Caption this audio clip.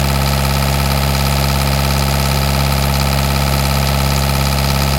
225 engine mono norm
boat, engine, sl-and-opensim-formatted